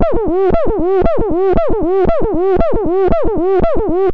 nordy glitch 004
click, synth, pop, nord, digital, modulation, raw, boop, fm, glitch, noise, wave, beep, buzz, idm, modular